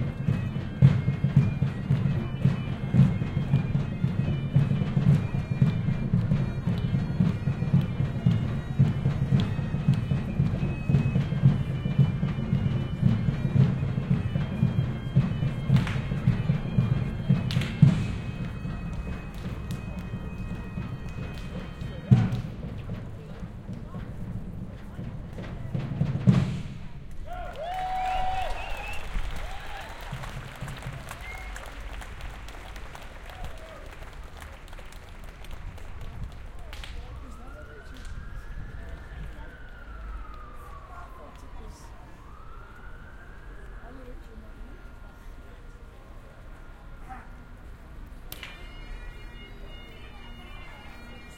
Musicians playing a traditional Scot tune with drums bagpipes, etc at The Mound, Edinburgh. Soundman OKM > Sony MD > iRiver H120
summer, street-musicians, field-recording, ambiance